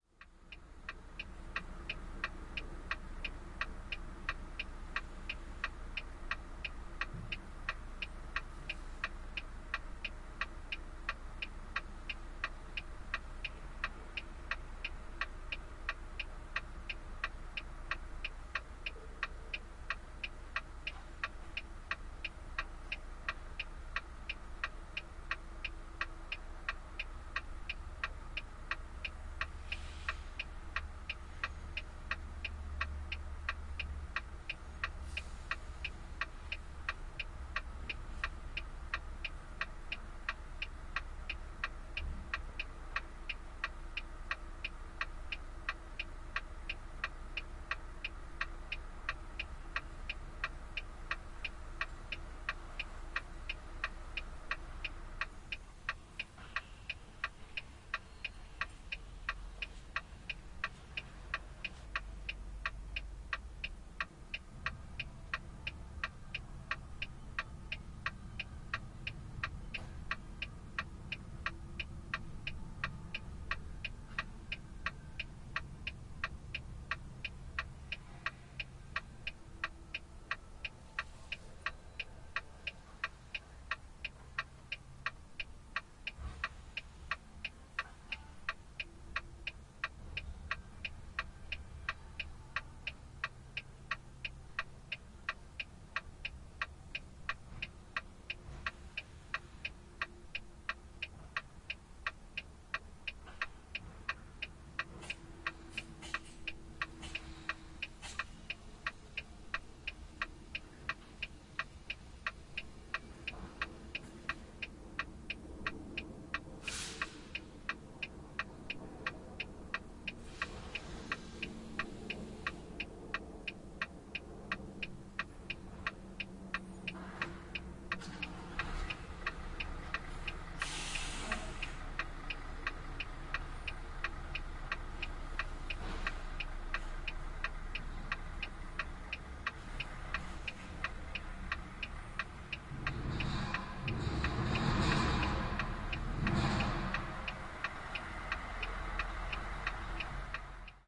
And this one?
16.08.2011: seventeenth day of ethnographic research about truck drivers culture. Hilden in Germany. Truck blinker. Waiting for the entance on loading hall.